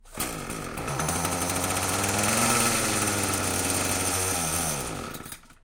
Broken weedeater
broken, engine, ignition, machine, motor, start, two-stroke, weed-eater, weed-wacker